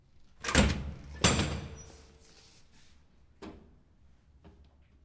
door push bar open nearby echo large room
large, echo, push, door, open, room, bar, nearby